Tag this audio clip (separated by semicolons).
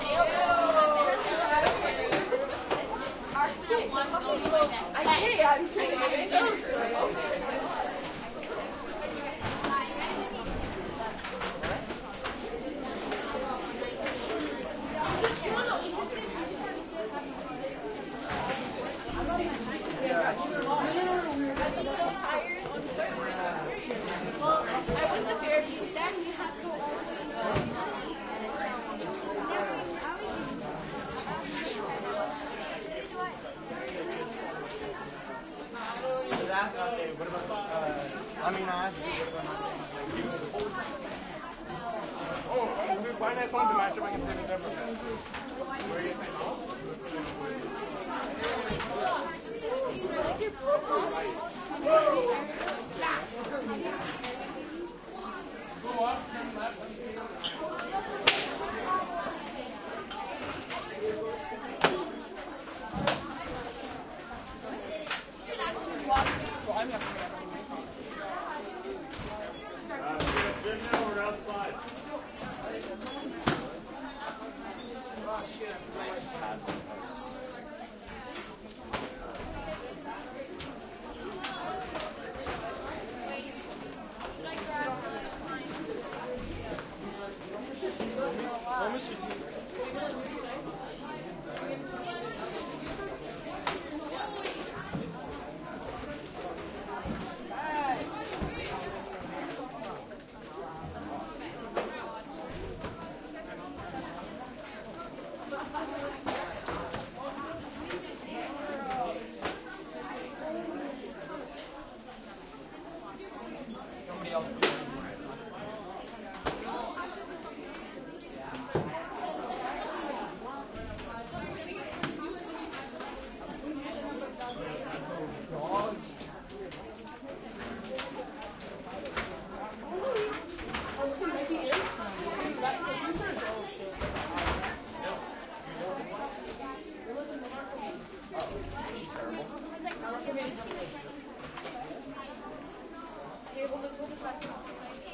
lockers; school; crowd; high; int; hallway